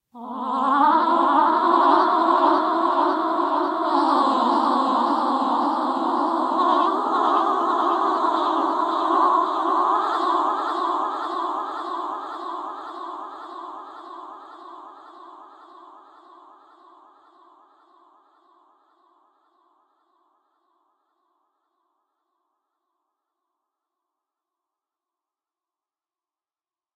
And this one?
Singing Ghosts II
creepy, eerie, ghosts, Halloween, horror, singing, voice